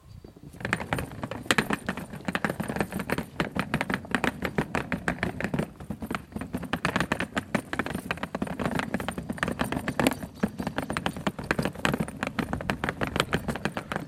Trolley BumpyStreet
Luggage Trolley wheels moving on bumpy street floor
baggage, Trolley